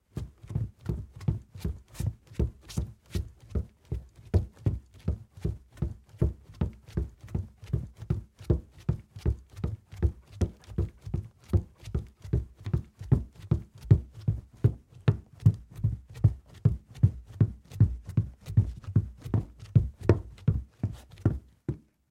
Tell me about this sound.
Footsteps Running On Wooden Floor Fast Pace
Sneakers, Woman, Wooden, Fabric, fast-pace, Foley, Hard-Floor, Trousers, Shoes, fast-speed, Staggering, Man, Wood, Asphalt, High-Heels, Boots, Walk, Clothing, Trainers, Quiet, Running, Hardwood-Floor, Footsteps, Heels, Concrete, Floor, Wooden-Floor, Walking